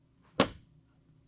paperback close
paperback book being closed shut
book, close, paperback